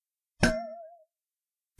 Small flask stuck in a mug which i dropped on the floor.
interesting sound which i then recorded three times slightly different microphone settings.
Used Swissonic Digital Recorder with stereo mics.
cup, strange